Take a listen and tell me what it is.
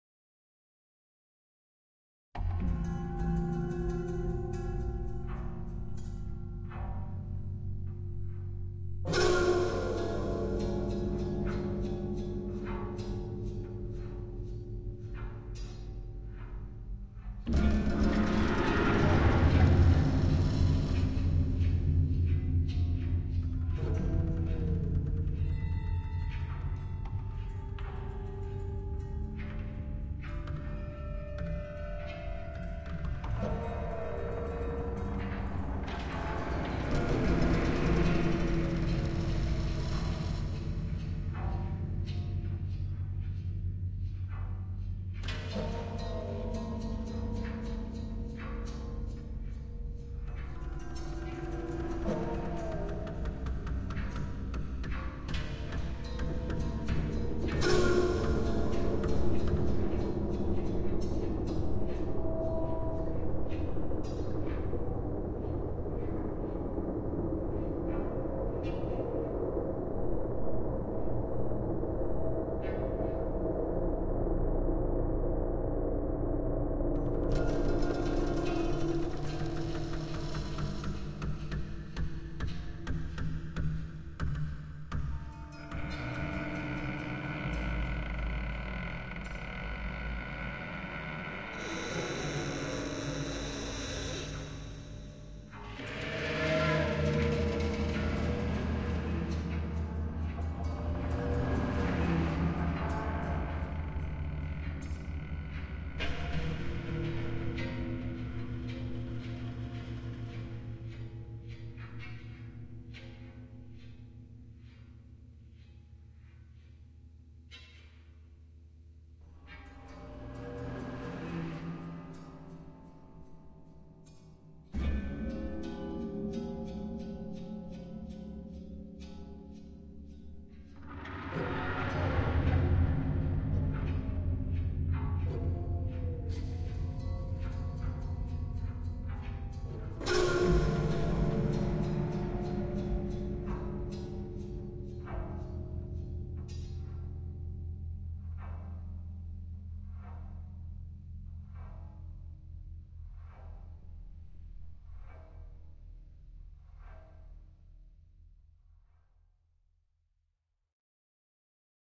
A brief abstract soundscape using acoustic material recorded live in Kontakt and then processed in an audio editor.